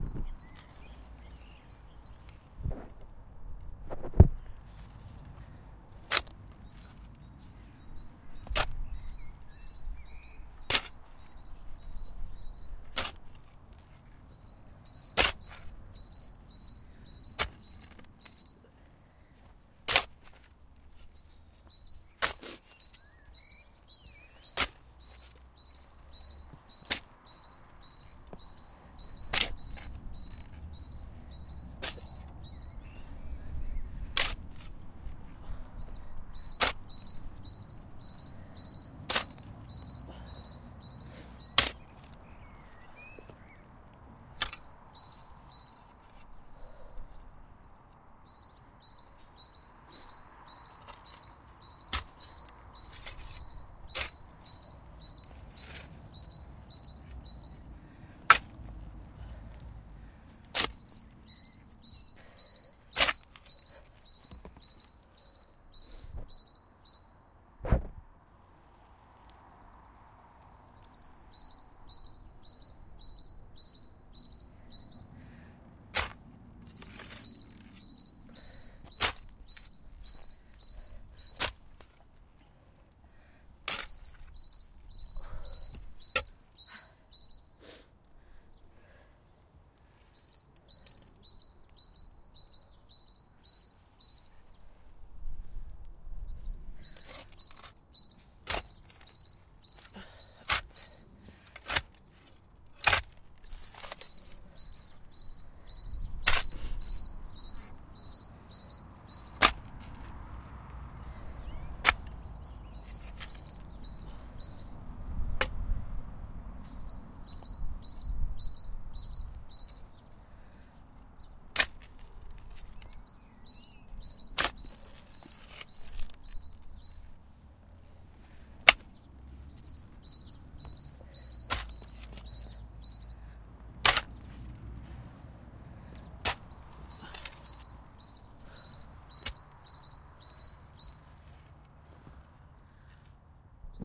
Digging a grave!
digging, earth, field-recording, gardening, grave, sun